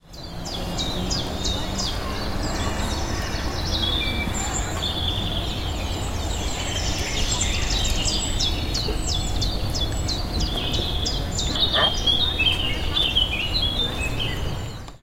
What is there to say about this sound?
los sonidos de pajaros en la uem